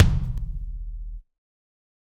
Kick Of God Wet 026
set, realistic, god, drum, drumset